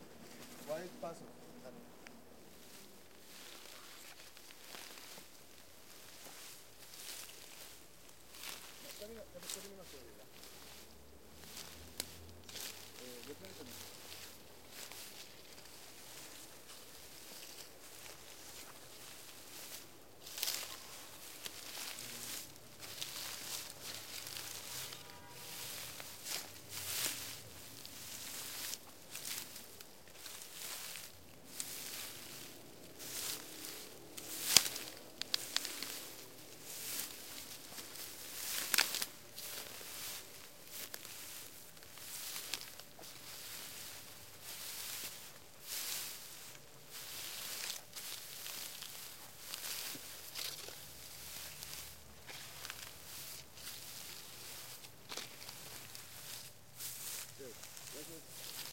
Wild pisadas sobre césped grande-Día-exterior-Quito, Ecuador. This sound it´s mine. Was recorded in the film "La Huesuda" with my NH4, in Quito-Ecuador. It´s totally free.
c, grande-D, pisadas, sobre, sped, Wild